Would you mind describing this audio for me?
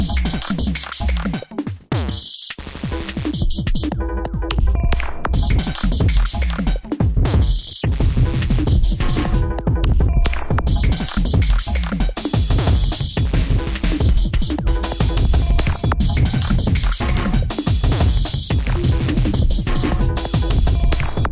Drumloops and Noise Candy. For the Nose
acid, breakbeat, drumloops, drums, electro, electronica, experimental, extreme, glitch, hardcore, idm, processed, rythms, sliced